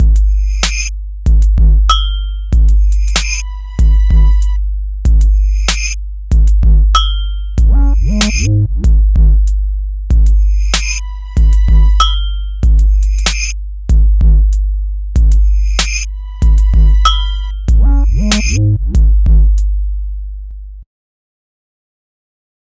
A mellow trap drum loop, with rimshots, agogo, sliding 808s, backwards sleigh bells and violins. If you end up rapping or singing over it or otherwise incorporating it into your own music, I'd love to hear the results - please leave a link in the comments.
95-bpm, beat, drum, loop, percussion, rhythm, trap